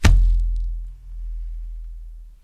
Balloon Bass 15
Balloon Bass - Zoom H2
Bass, sub